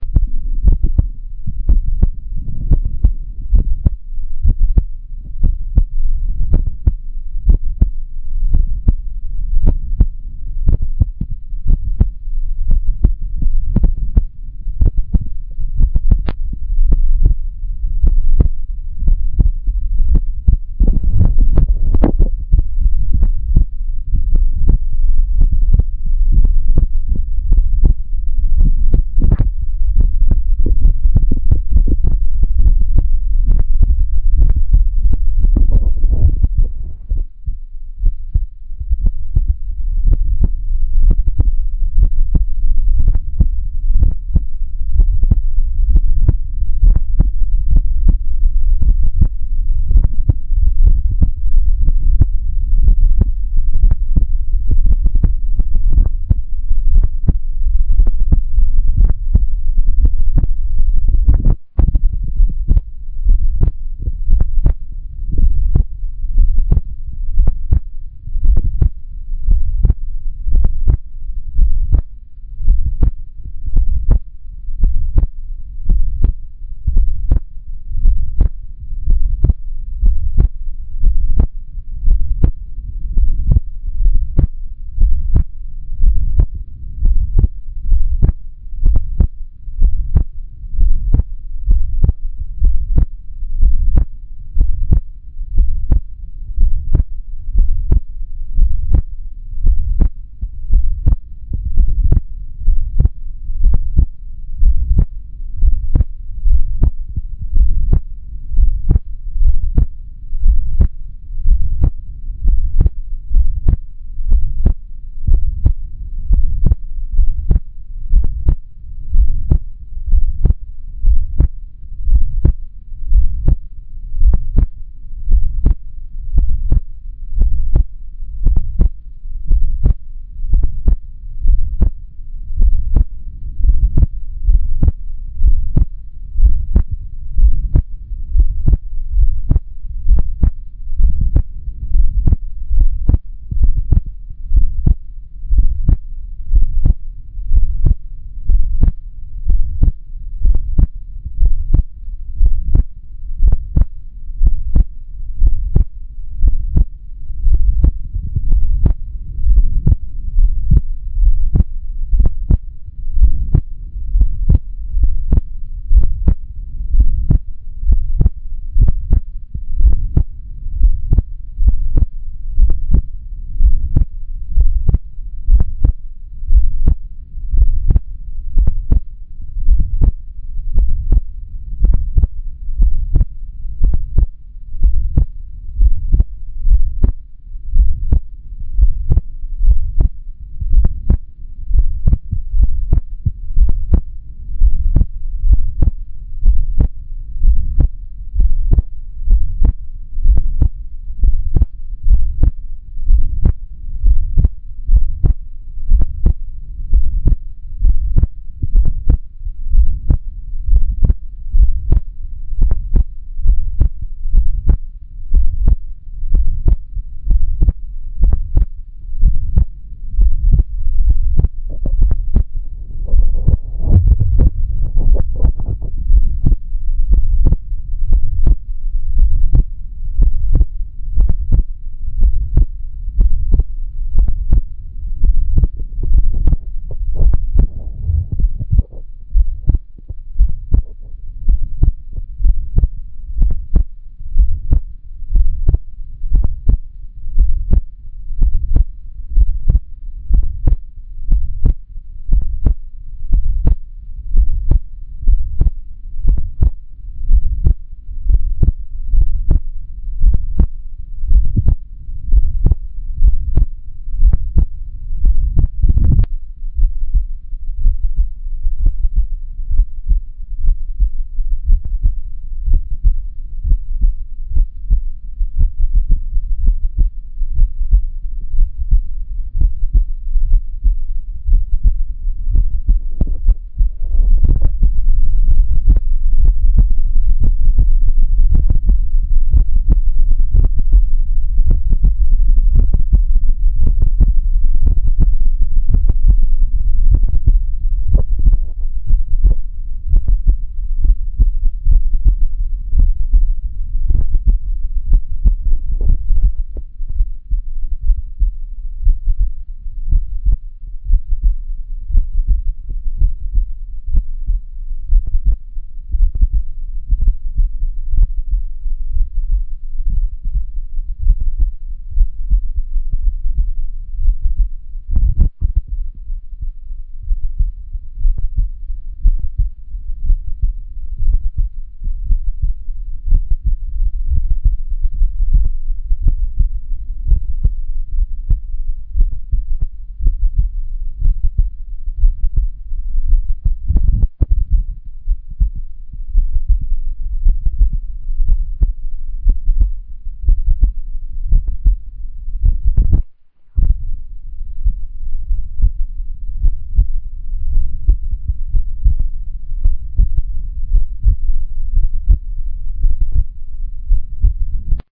Sound of a heart beating slowly. Can be sped up or slowed down.